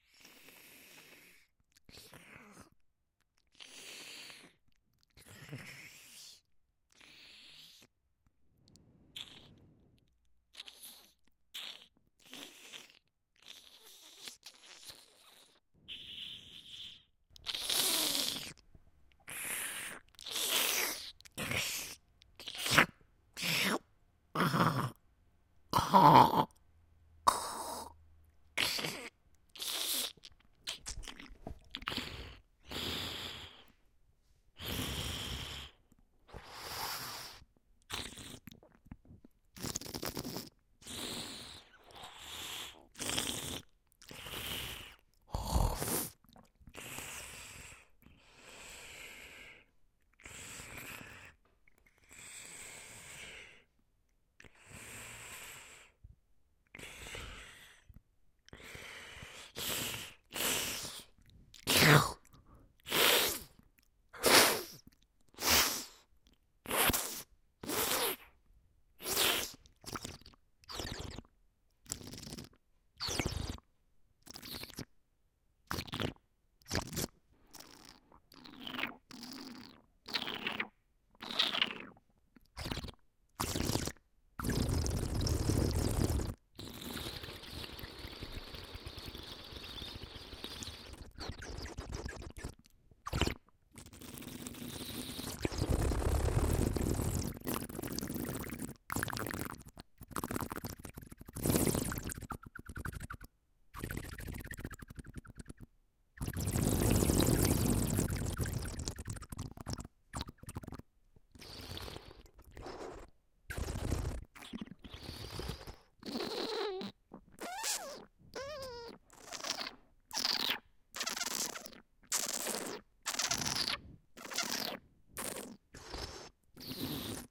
Something to create spider sounds from I hope